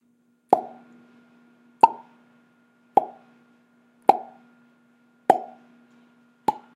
Some popping sounds I made with my mouth. I used audacity and my input microphone.
Burst, Disappear, Pop